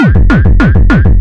Loop Kick 02 Early Frenchcore 200 Bpm 1 Bar iElectribe
By Roy Weterings
I used this for making Early Frenchcore tracks in Ableton Live.